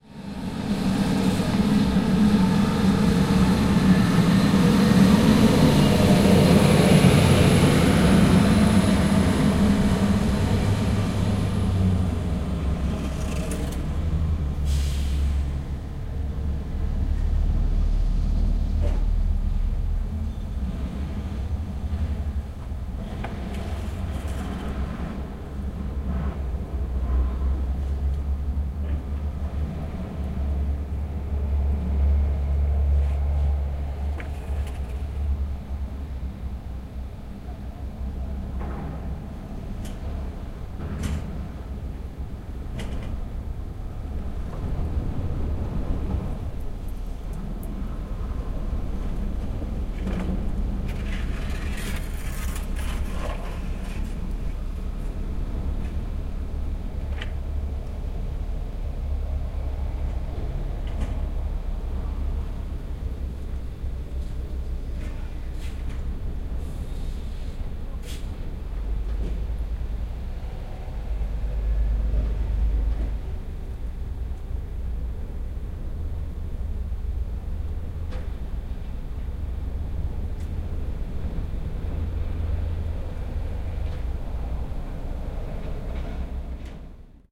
19.08.2011: twentieth day of ethnographic research about truck drivers culture. Hamburg in Germany. The noise made by huge container crane. The yard of sud west terminal in the river port in Hamburg.